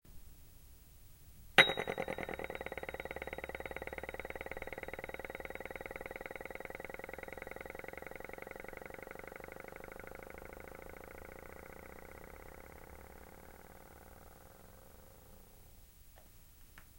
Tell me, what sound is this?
The Speeding Cup
A rocking cup heading into an infinity of microscopic sound